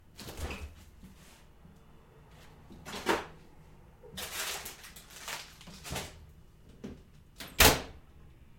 Open, get some item in the plastic and close refrigerator.
cold-machine, household, kitchen